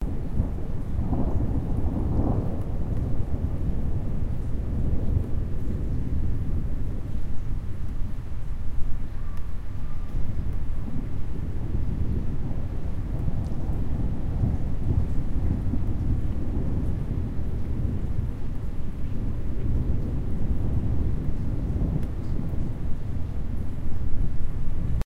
thunder growling
Rolling thunder with a bit rain recorded with a Zoom H1 XY-microphone.
But you don't have to.
Wanna see my works?